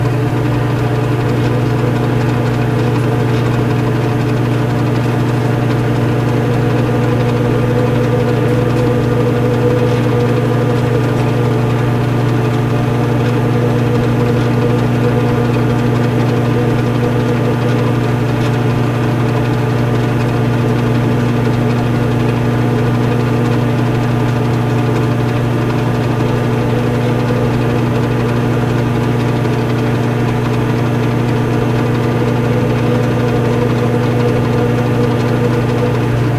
microwave loop
Sound of a microwave at work. Recorded with a Sony IC Recorder and processed in FL Studio's Edison sound editor.